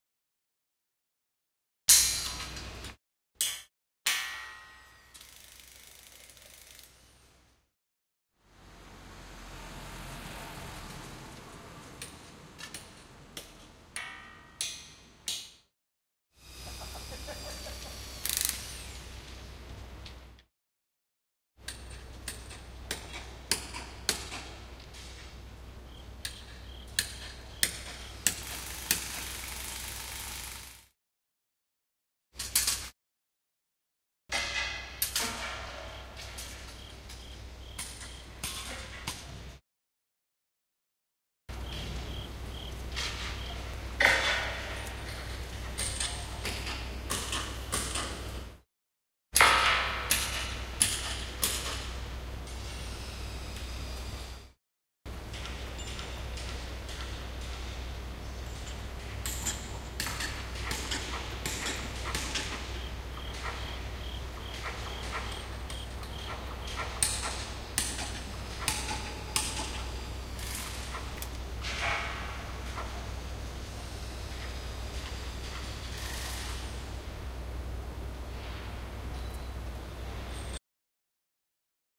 Japan Matsudo TrainTracks Maintenance Part2 FX
Walking in the city of Matsudo around midnight, looking for interesting sounds, I noticed that the central stations' train tracks were undergoing maintenance work. I recorded two takes. For this second take I had to cut out a lot of parts where people were talking (privacy), but it still features a lot of awesome clang sounds produced by hammering on the metal tracks.
Recorded with Zoom H2n in MS-Stereo.
Matsudo, night, construction-works, maintenance, construction, iron, Japan, metallic, drilling, metal, hammering, steel, drill, sword, Japanese, field-recording, pipe, clang, shield, worker, Tokyo, train-tracks, metal-plate